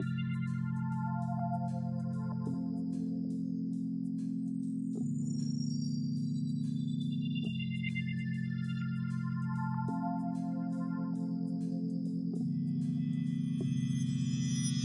Ambient Seven Loop1
A small loopable stretch from an ambient piece I am working on with freesounder zagi2.
6 bars at 97 BPM. - Enjoy !
chilled, loopable, soft, relax, seamless-loop, ambient, 97bpm